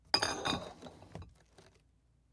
Box Of Bottles Put In FF257
Glass bottle sliding in box, glass bumping glass, glass-on-glass tinging, medium to low pitch.
glass-movement, glass-ting